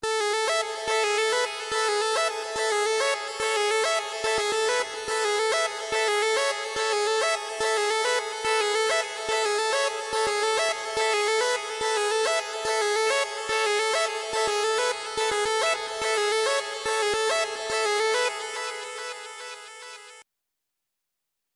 skip synth
rhythm synth with delay and reverb
delay, reverb